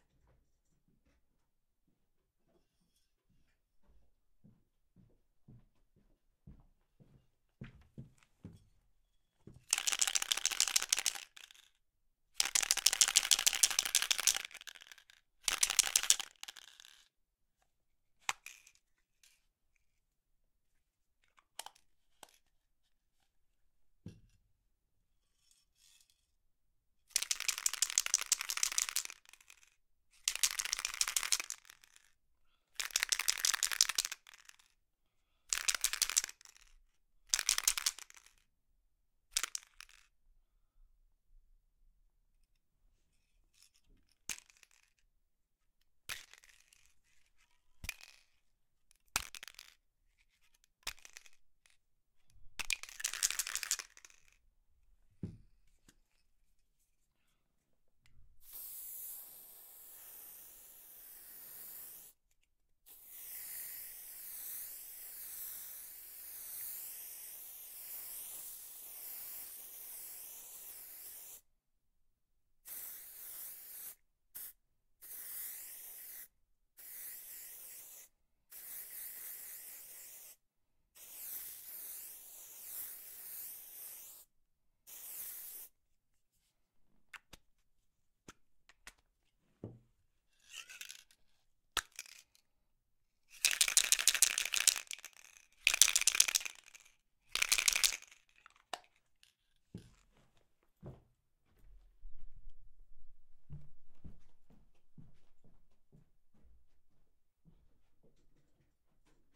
shaking a can of paint in spray, uncovering a cap, spraying.
Recorded with TLM103.
Hope it'll be usefull
aerosol, farba, graffiti, paint, spray, spraycan, spreyu, w